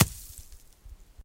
rcok falls 01
falling rock hits the ground
hit, rock